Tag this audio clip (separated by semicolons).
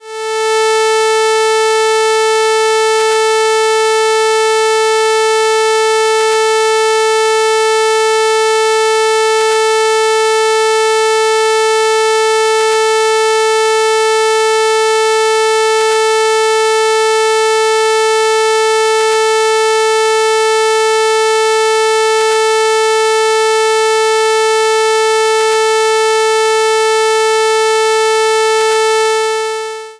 440hz; synth